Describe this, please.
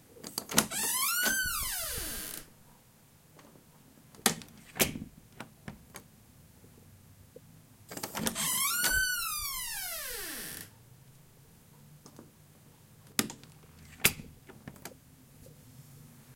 Opering/closing doors enough said!